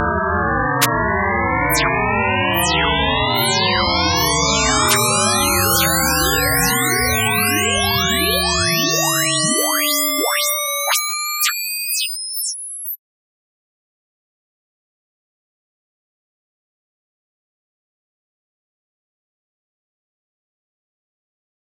asceninding weirdness
sci-fi, odd, science, fi, weird, fiction, sci